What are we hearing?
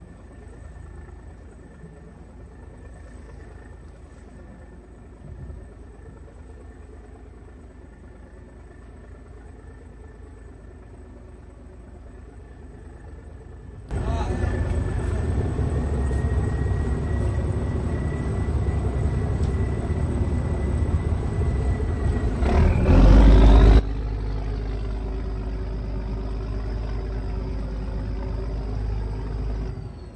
Ferry between Lumut and Pangkor Island (both Perak - Malaysia)
Drive, Ferry, Motor